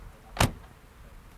car-door slam
Closing a car door.
Recorded in December 2006 on a Telefunken Magnetophon 301.